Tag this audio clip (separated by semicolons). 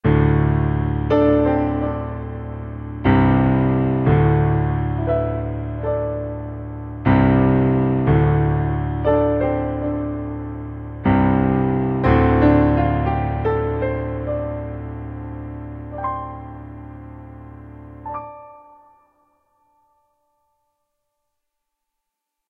Loop; Piano; Old-school; Intro